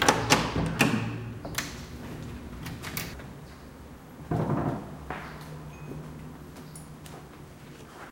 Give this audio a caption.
Door Opening
Suspense, Orchestral, Thriller